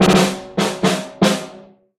triple snare
This snare was recorded by myself with my mobilephone in New York.
Cutted, Snare, Tripled